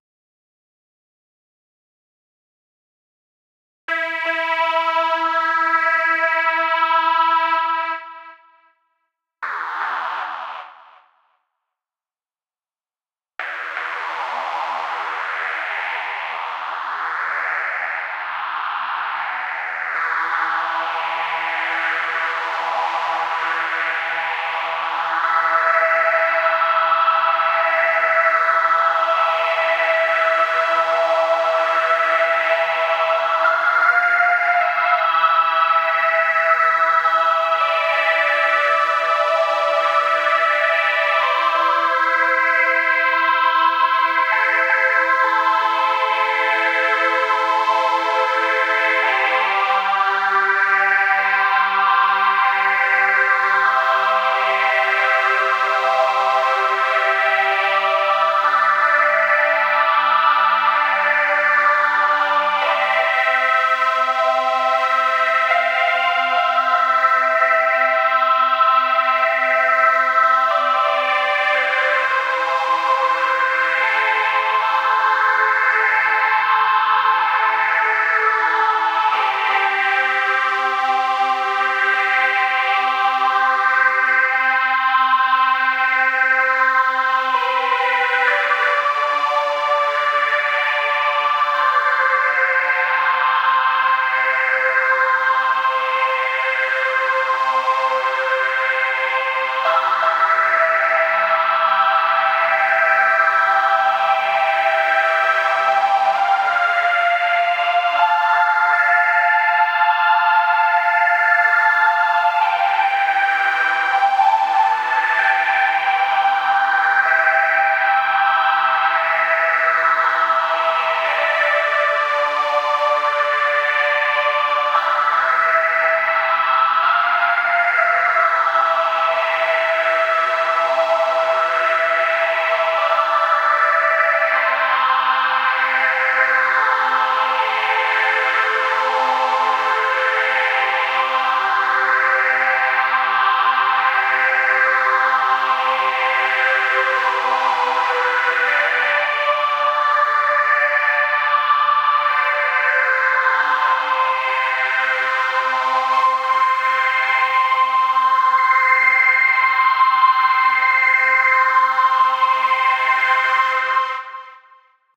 Sweeping Synth
Slowly playing a christian hymn, I think in English it is "At the cross"
calm, hymn, stereo